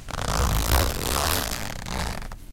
This is a coat zipper, unzipping all the way.